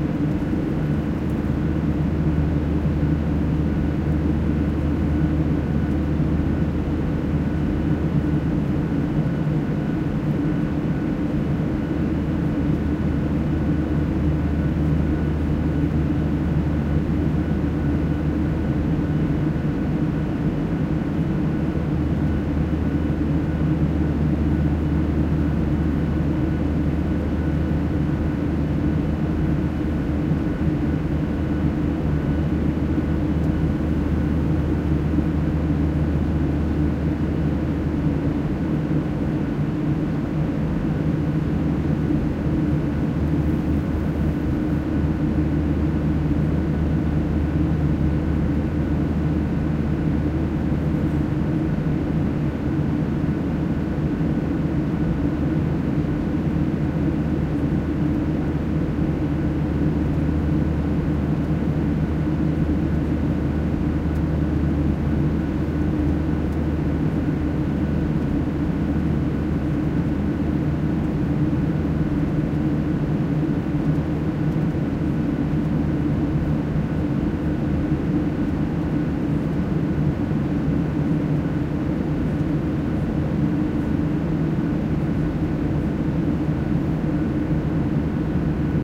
In-flight Ambience - Boeing - 737-838
1:29 of in-flight ambience of a Boeing 737 (800 series) passenger jet owned by Qantas. About 1/2 way between Brisbane and Adelaide.
aeroplane, aircraft, airplane, ambience, cabin-noise, field-recording, jet, jet-engine, machines